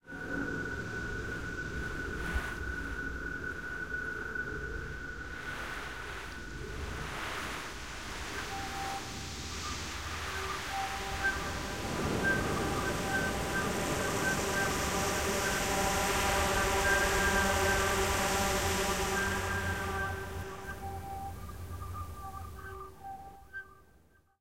bright wind
A sample evoking air and wind. Uses instrument sounds, polar wind, physical models, ...
wind, outdoor